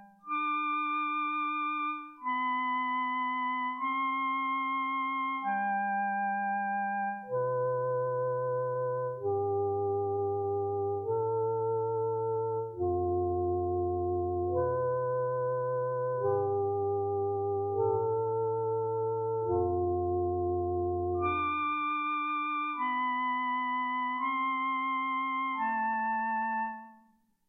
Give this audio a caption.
Create004B Pink Extreme
This Sound Was Created Using An FM7 Program Keyboard. Any Info After The Number Indicates Altered Plugin Information. Hence A Sound Starts As "Create" With A Number Such As 102-Meaning It Is Sound 102. Various Plugins Such As EE, Pink, Extreme, Or Lower. Are Code Names Used To Signify The Plugin Used To Alter The Original Sound. More That One Code Name Means More Than One Plugin.
Ambient, Dark, Mood, Scifi